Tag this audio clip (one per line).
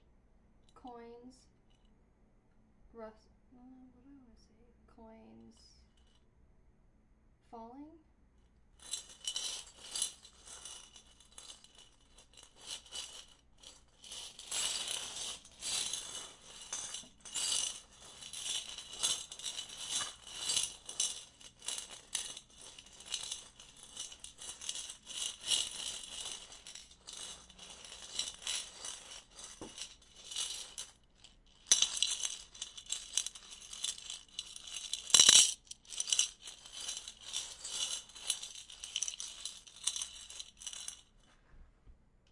dropping
rattle
coins